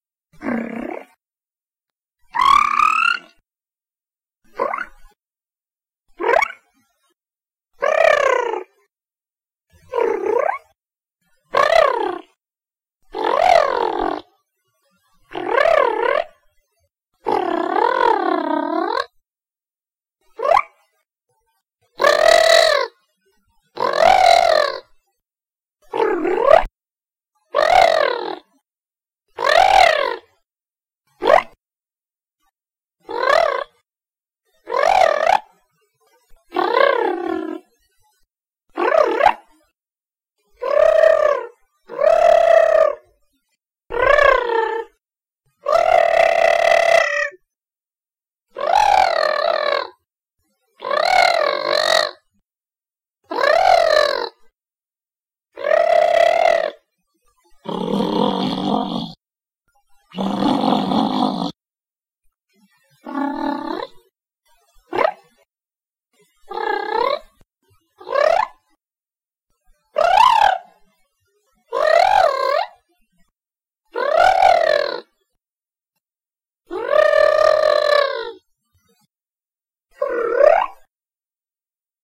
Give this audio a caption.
volpiline calls
these are the types of sounds that volpilines, a creature I made up, make
calls, creature, volpiline